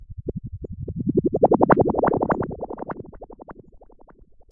A short electronic sound good for an error sound, startup noise, or alert. Also may be good for podcasts.